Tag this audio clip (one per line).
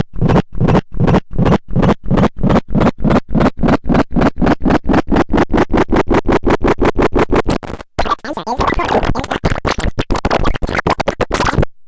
just-plain-mental
core
bending
rythmic-distortion
coleco
experimental
glitch
murderbreak
circuit-bent